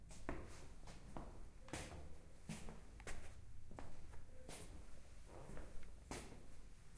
Walking in a bathroom.
walking, bath, WC, bathroom, campus-upf, toilet, UPF-CS14, field-recording, ambiental